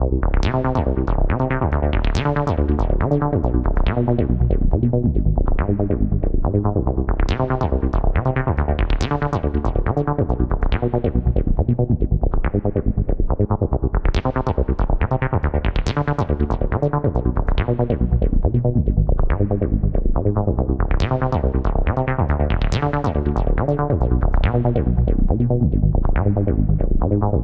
Trance Lead 140-bpm
lead, bpm, sound, 140, trance